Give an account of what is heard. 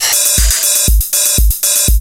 Thank you, enjoy
drum-loop, drums, beats